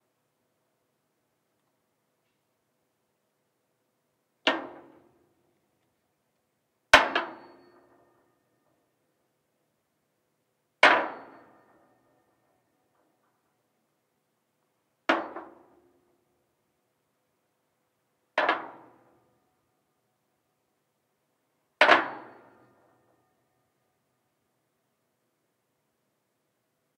Hammer On Metal Fence
The sound of a hammer being slammed against a metal fence. Recorded outdoors.